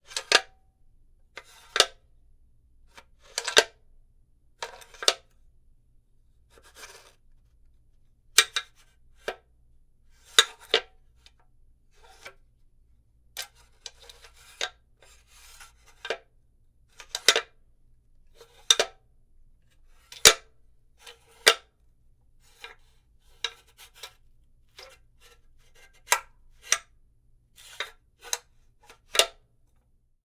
A few sounds made using a tile cutter (no tiles were cut).
impact, metal